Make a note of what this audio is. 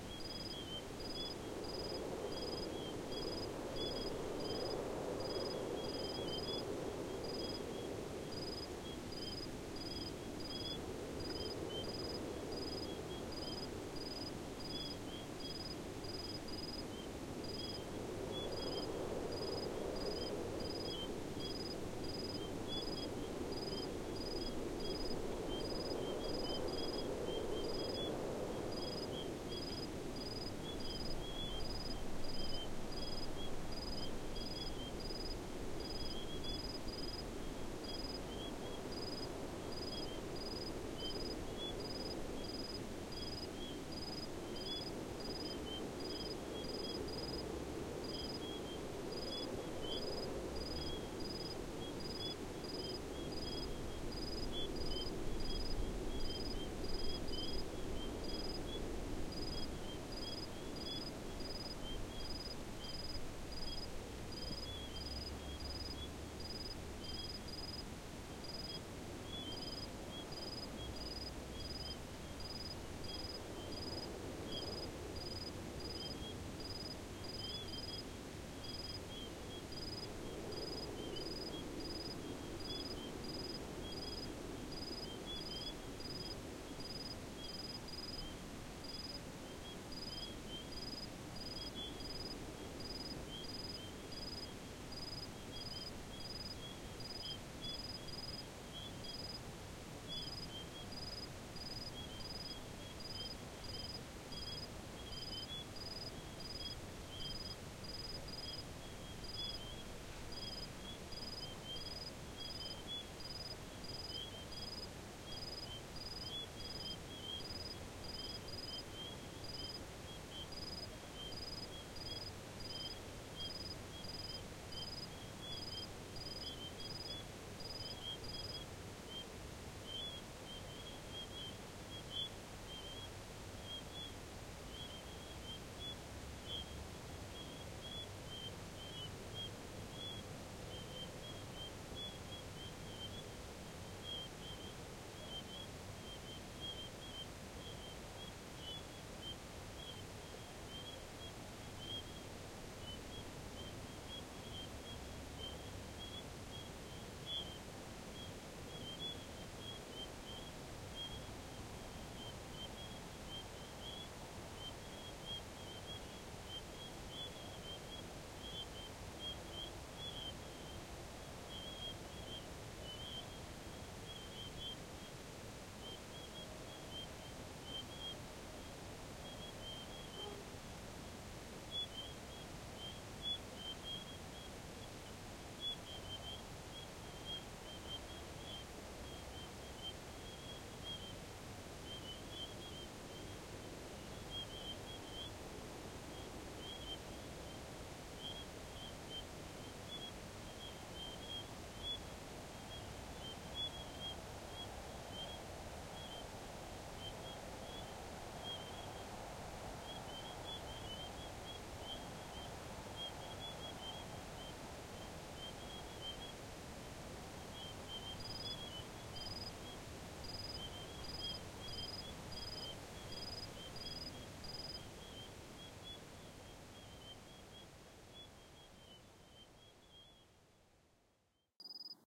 The Australian Desert at night.